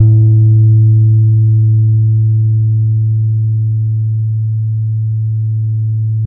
A 1-shot sample taken of a finger-plucked Gretsch Electromatic 30.3" (77 cm) scale length bass guitar, recorded direct-to-disk.
Notes for samples in this pack:
The note performances are from various fret positions across the playing range of the instrument. Each position has 8 velocity layers per note.
Naming conventions for note samples is as follows:
BsGr([fret position]f,[string number]s[MIDI note number])~v[velocity number 1-8]
Fret positions with the designation [N#] indicate "negative fret", which are samples of the low E string detuned down in relation to their open standard-tuned (unfretted) note.
The note performance samples contain a crossfade-looped region at the end of each file. Just enable looping, set the sample player's sustain parameter to 0% and use the decay parameter to fade the sample out as needed. Loop regions begin at sample 200,000 and end at sample 299,999.